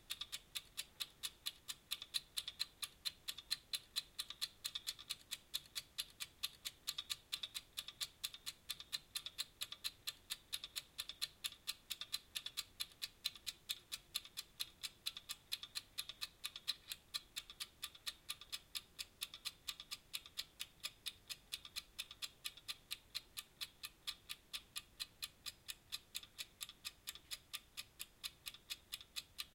Here I used the microphones of an Olympus LS-10. Distance timer to microphones: 30 cm or 1 ft.